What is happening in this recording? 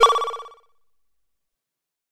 This sound is part of a pack of analog synthesizer one-note-shots.
It was made with the analog synthesizer MicroBrute from Arturia and was recorded and edited with Sony Sound Forge Pro. The sound is based on a triangle wave, bandpass-filtered and (as can be seen and heard) pitch modulated with an pulse wave LFO.
I've left the sound dry, so you can apply effects on your own taste.
This sound is in note F.